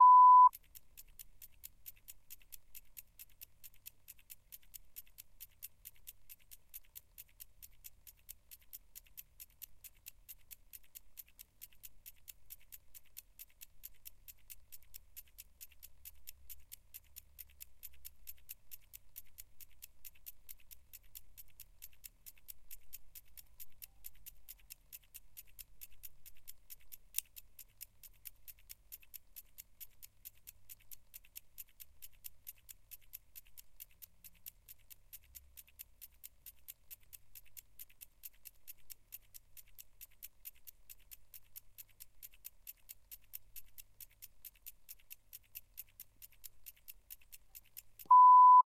Clockwork Timer 01
Clockwork mechanism ticking type 1. Unedited sound.
bomb, clock, clockwork, escapement, machine, mechanism, tic, tick, ticking, time, timepiece, timer, watch